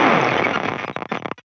Please refer to the first sample in the sample pack for a description of how the samples were created.I went back to the first sound obtained from the amp with no other effects or processing.The digital glitch type of sound was asking to be put through a bitcrusher plug-in...So here it is.